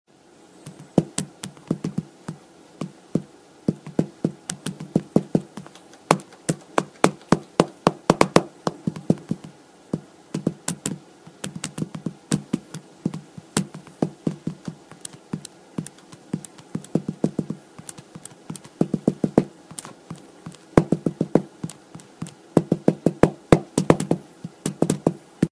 Tapping away on a smartphone
iPhone, text, mobile, typing, smartphone, cell, phone, message, cell-phone, tapping, tap, texting